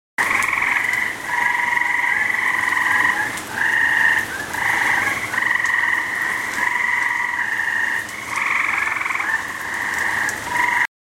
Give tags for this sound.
animals nature Rain

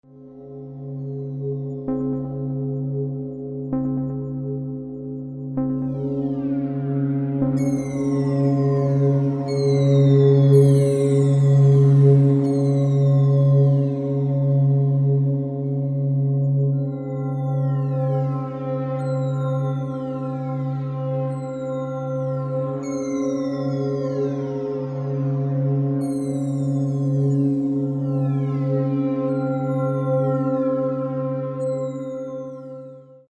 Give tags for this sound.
synth-sound experimental synthesis

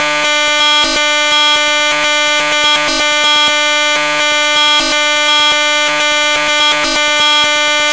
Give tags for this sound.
alien basic-waveform digital electronic experimental glitch impulse laser minimal rhythm sci-fi sound-design tone

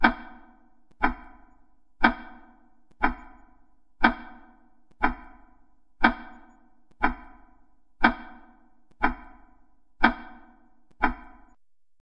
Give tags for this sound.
clock clockwork grandfather-clock hour old tic-tac tick tick-tock ticking time timepiece wall-clock

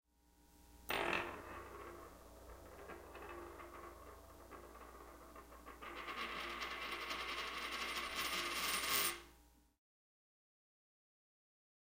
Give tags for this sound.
coin coin-spinning

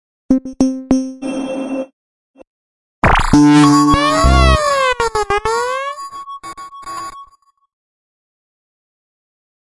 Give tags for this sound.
120BPM ConstructionKit dance electro loop

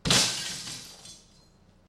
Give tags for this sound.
window smash loud glass explosion pane dropped broken field-recording

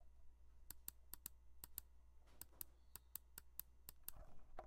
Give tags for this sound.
mouse Computer pc

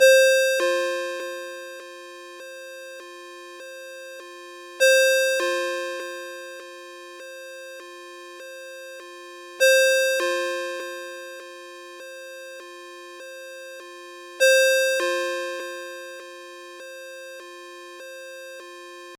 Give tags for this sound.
alarm alert alerts cell cell-phone cellphone mojo mojomills phone ring ring-tone ringtone square

